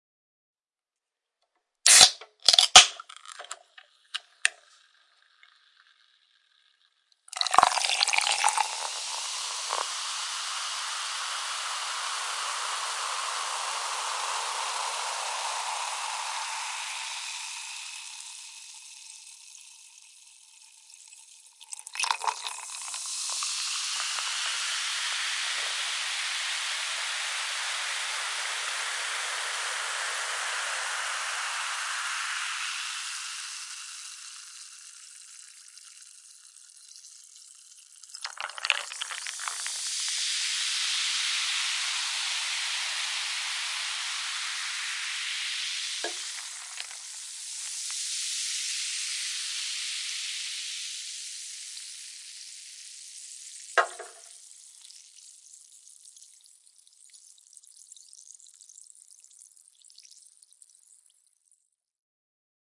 Can Open and Pour
This is the sound of a can being opened and carbonated drink being poured into a glass. There are several pours in this recording.
- PAS
Drink, Opening